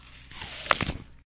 Apple Bite
Sound of a bite of an apple. Actually was a pretty mushy apple, but some EQ gives it a more crispy juicy sound.
crunching, food, snack, juicy, chew, crunch, bite, eating, apple, crunchy, crispy